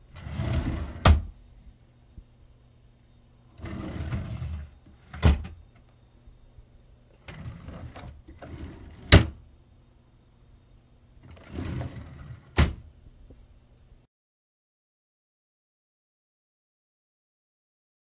opening and closing of an office desk
desk, inside, office